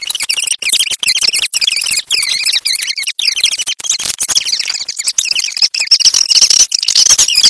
Wurtia Robto
A sound I experimented with one day. I have no idea why it has such a weird name. But slow it down and see what I did to get my results. You'll get a very bad quality voice.
wars, laser, robot, star, can, shooting, trash, r2d2